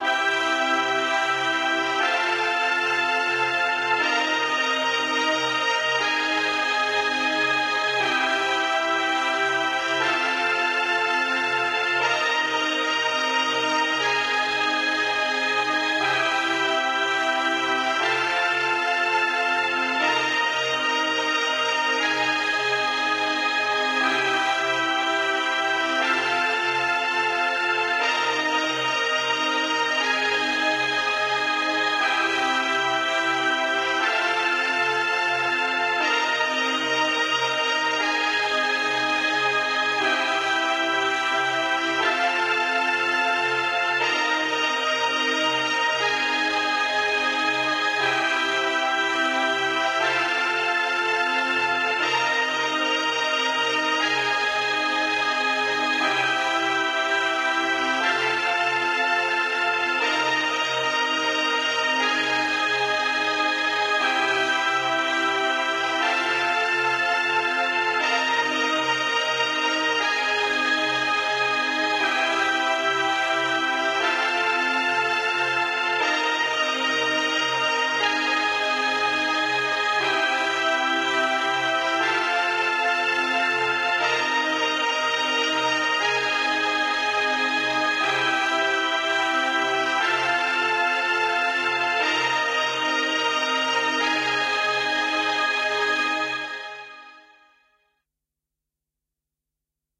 Kazoo synth loop 006 wet 120 bpm version 3
synth, bpm, kazoo, 120bpm, loop, 120, synthetyzer